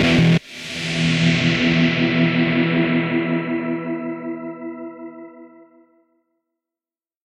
GUITAR STAB EFFECT in E
A guitar stab which repeats across the two stereo channels then has a huge reverb and a synthesizer effect following it. Original key is E and original tempo is 160BPM
axe, distorted, distortion, e, guitar, reverb, stab